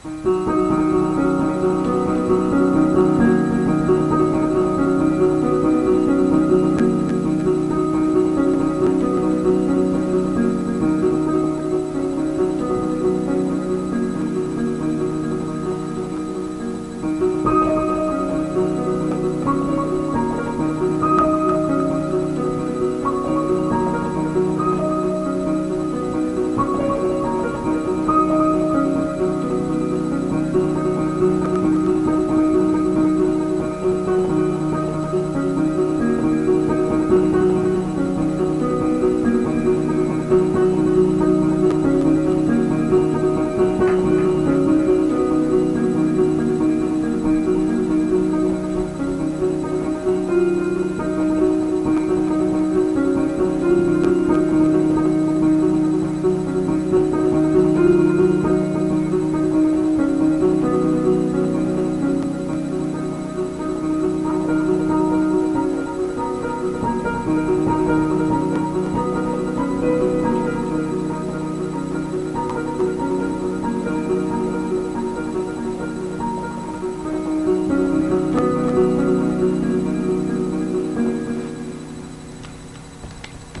Piano Echo
A short piano rif played by me and passed through an echo filter. It was inspired by a rainstorm.
melodic, piano, soft